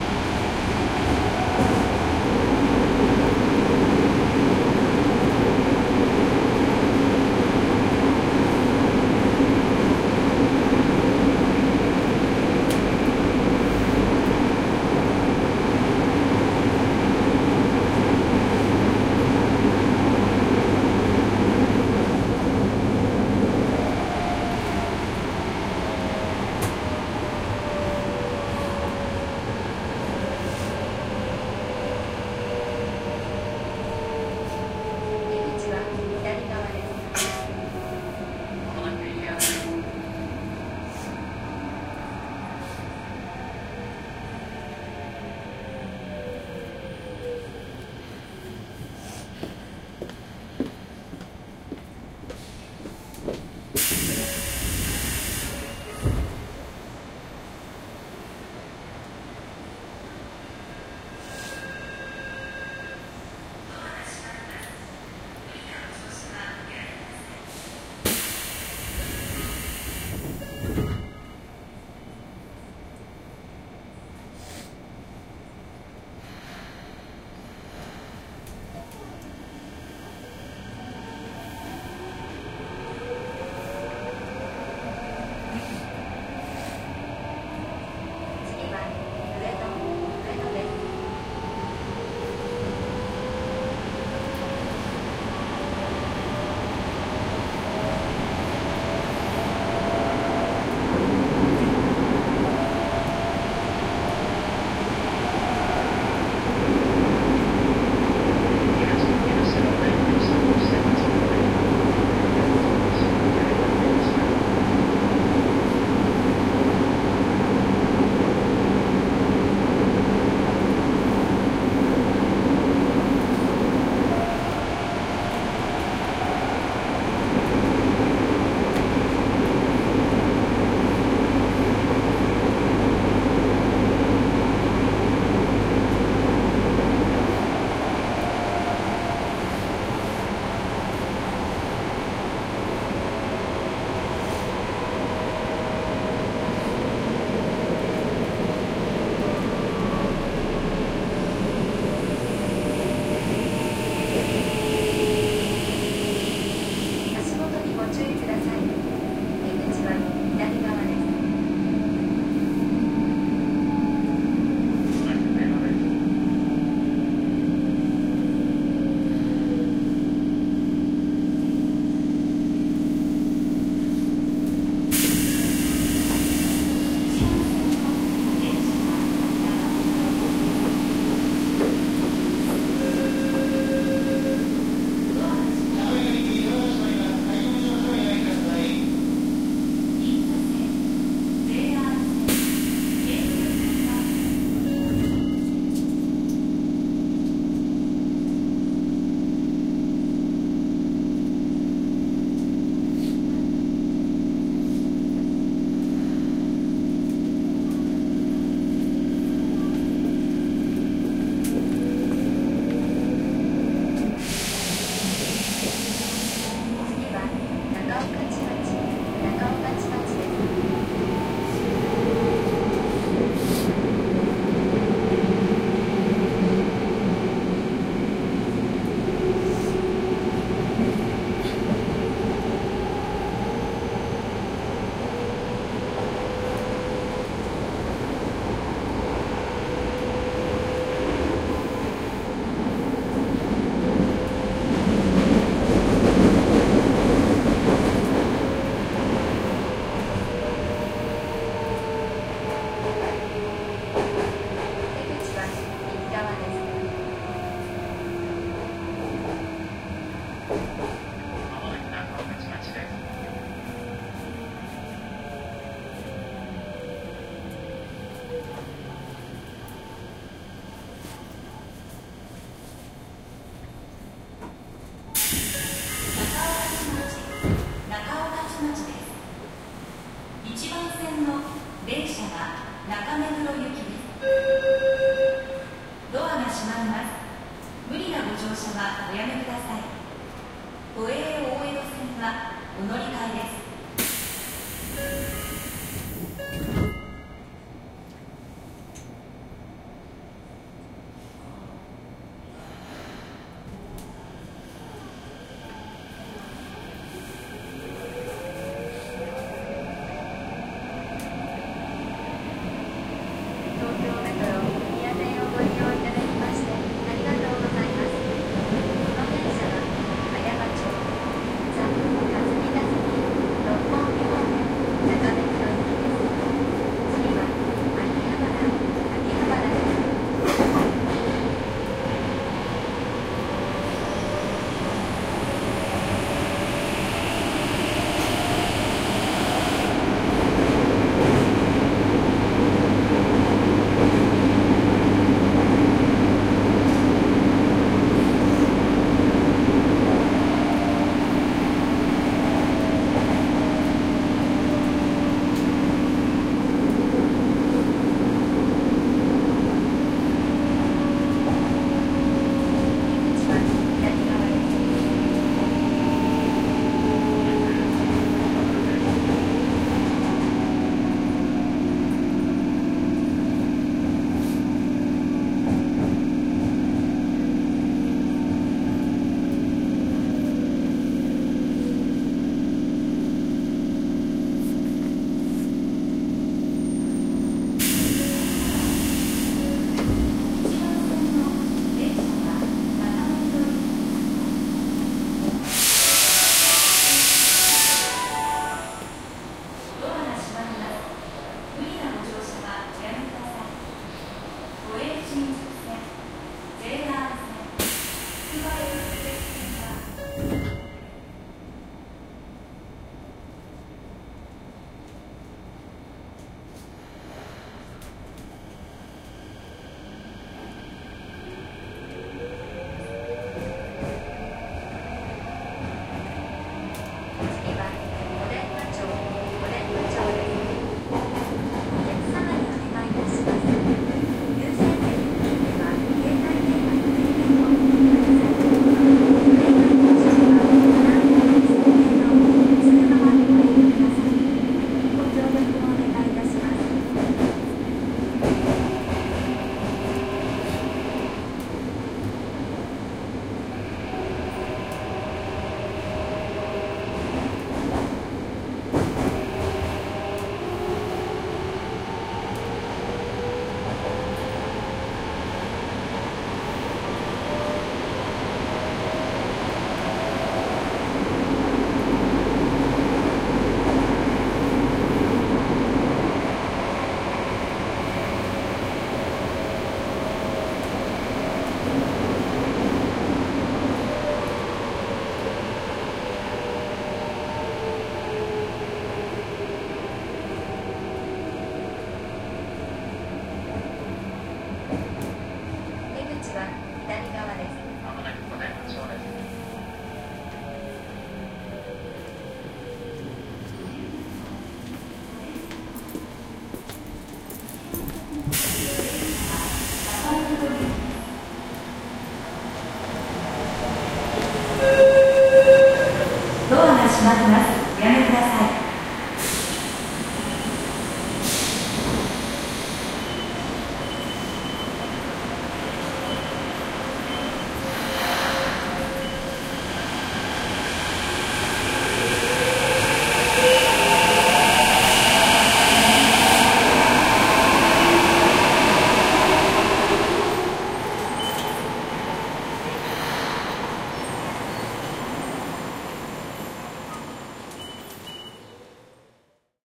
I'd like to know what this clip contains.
Japan Tokyo Ueno Train Station Engines Announcements Coughing Sneezing
One of the many field-recordings I made in train stations, on the platforms, and in moving trains, around Tokyo and Chiba prefectures.
October 2016. Most were made during evening or night time. Please browse this pack to listen to more recordings.
railway, footsteps, metro, beeps, rail, announcements, platform, train-station, tube, Tokyo, arrival, departing, subway, field-recording, train-tracks, public-transport, Japan, departure, tram, announcement, train, station, railway-station, transport, train-ride, depart, underground